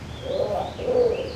Call from a Wompoo Fruit-dove. Recorded with a Zoom H2.